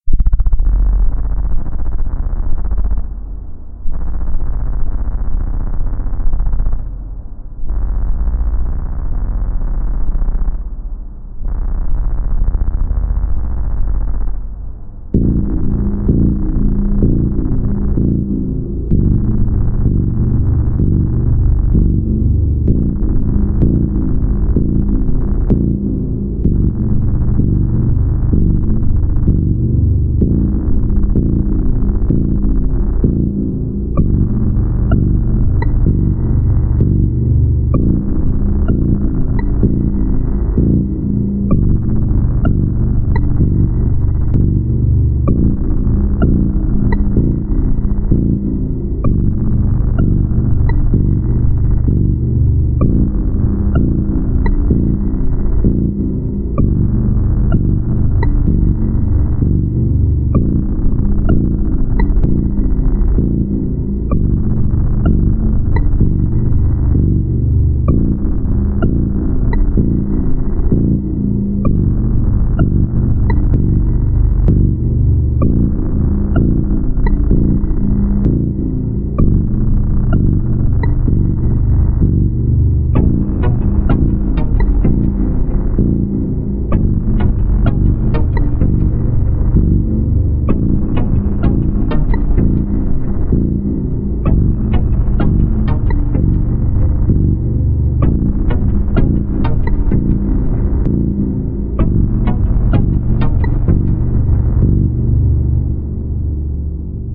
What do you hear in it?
A piece of music you can use.